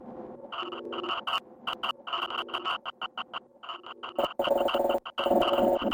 betaeight
Shufflings of static with throaty mid-lo bass purr